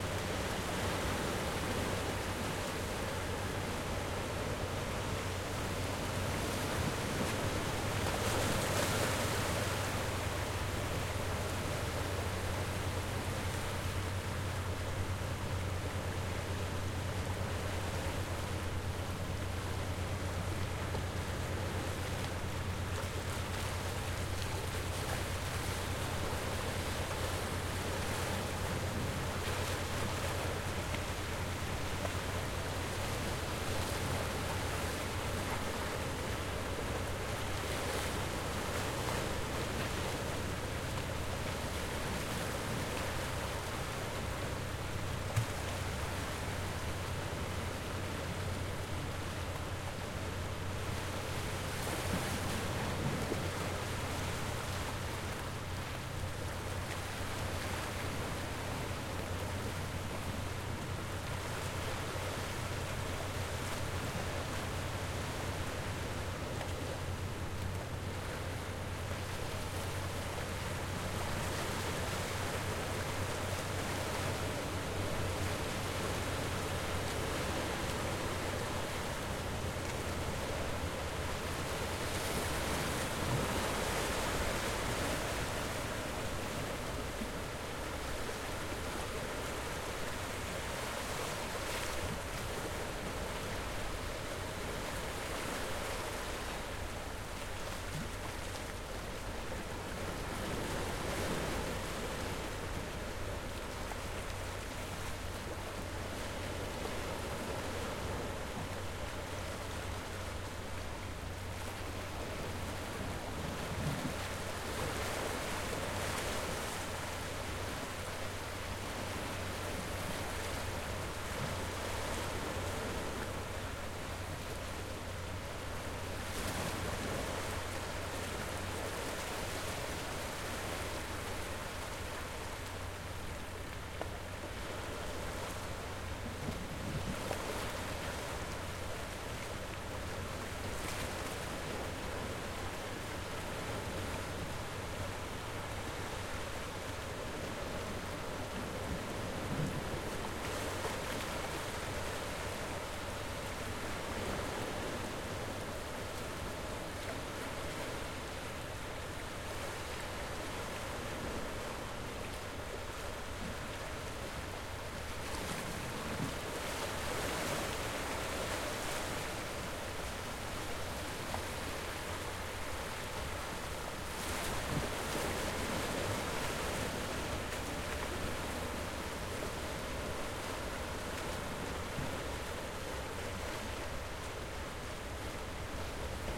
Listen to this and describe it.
Longer version of ocean water crashing on rocks on the Maine coast. Low hum of fishing boat can be heard in the R channel background.